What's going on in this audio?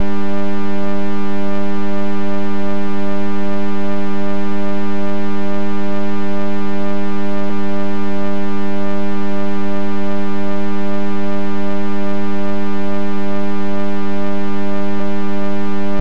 2 Osc Smooth Nice Lead
Sample I using a Monotron.
Bass, Lead, Monotron, Oscillater, Sample, Synth